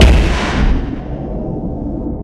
An explosion in space
bomb, detonation, explosion, grenade, space